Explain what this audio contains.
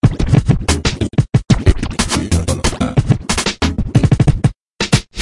92bpm QLD-SKQQL Scratchin Like The Koala - 012
turntablism, record-scratch